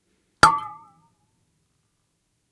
samples in this pack are "percussion"-hits i recorded in a free session, recorded with the built-in mic of the powerbook
ping, water, pong, metal, boing, bottle, noise